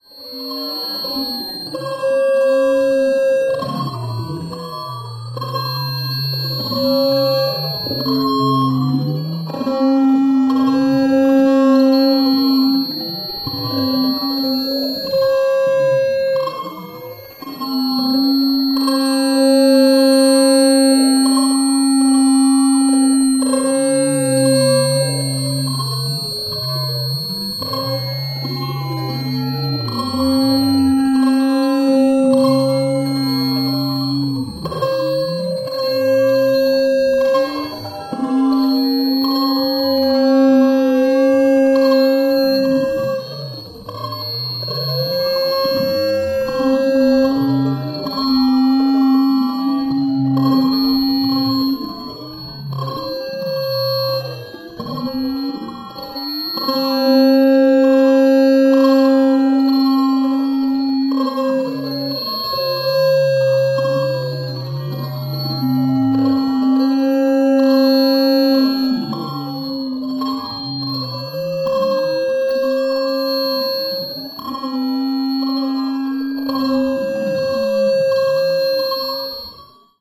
Strange animals
Alien bovines mooing weirdly. Sample generated using a Clavia Nord Modular and then processed with software.
Animal, Noise, Alien, Futuristic, Weird, Strange, Bizarre, Sci-fi, Electronic